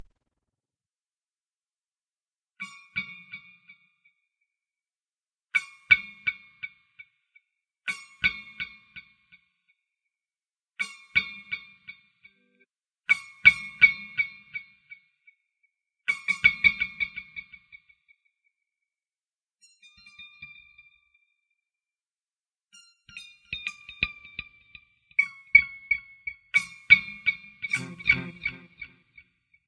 Some spooky sound effects created by using my guitar and a vintage analog delay unit. Medium delay.